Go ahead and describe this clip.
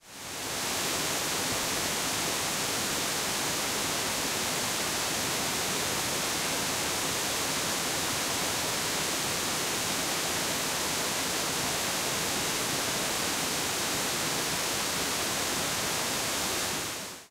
Waterfall - Against Rapids
Field recording of a waterfall alongside some rapids in a creek.
Recorded at Springbrook National Park, Queensland using the Zoom H6 Mid-side module.